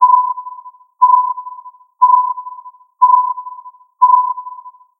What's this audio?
Radar, Something Detected, 05 Sec
Radar, Something Detected...
If you enjoyed the sound, please STAR, COMMENT, SPREAD THE WORD!🗣 It really helps!